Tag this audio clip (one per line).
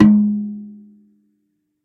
birch
drum
General
GM
melodic
MIDI
tom